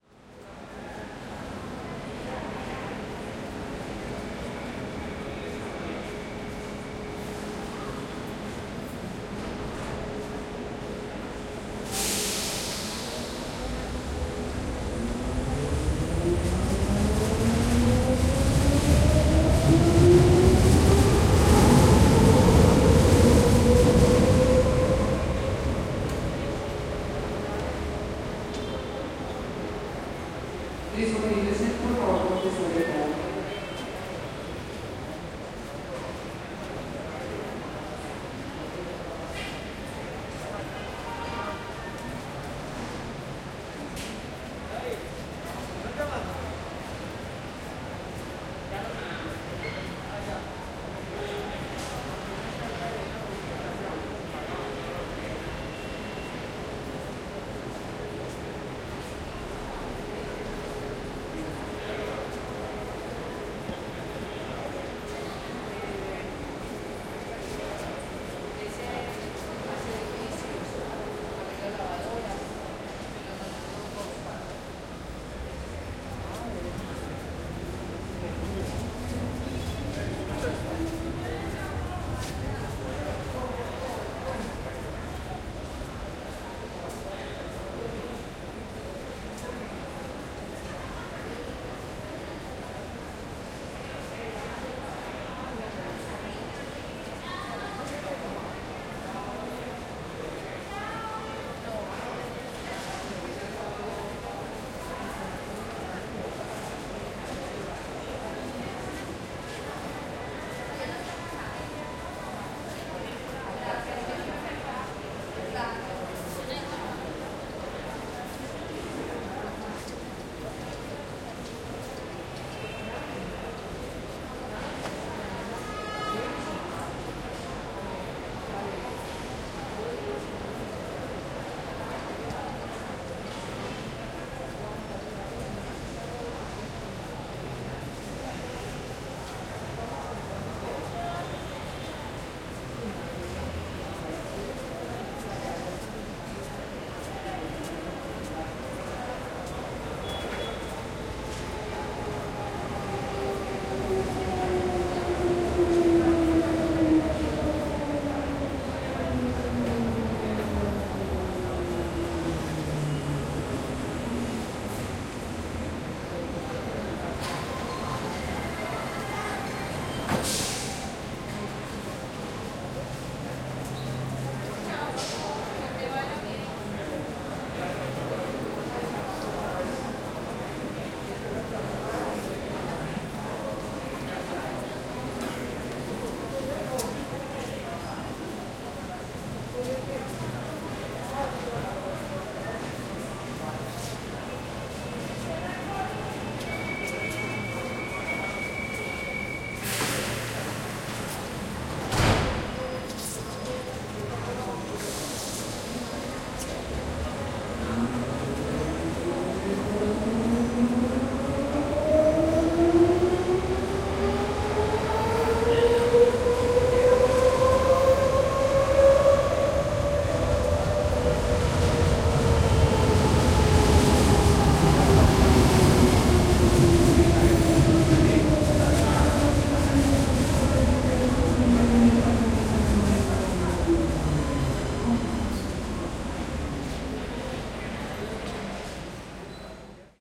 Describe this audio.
Walla from a Medellin's metro station in a calm day Stereo. Recorded with Zoom H3-VR.